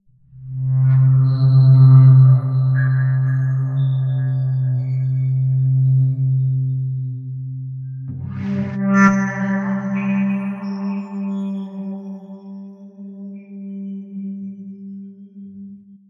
A gentle pad with high metallic/watery sounds.
distant, drip, pad